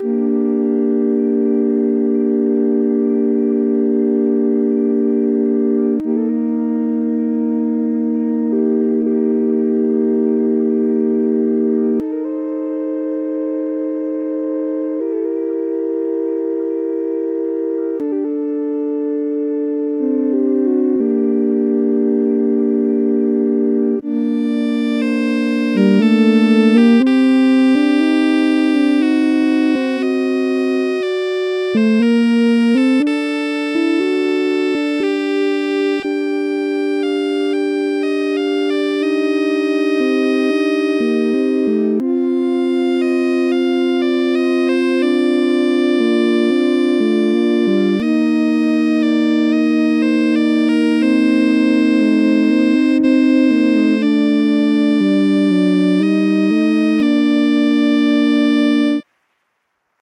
Adagio from organ C4 and violin A4
The beginning of Albinoni's Adagio in G minor constructed completely from just two tones, using time and frequency scale transformations. This was a peer assignment for 2015 ASPMA course.
The starting tones were these: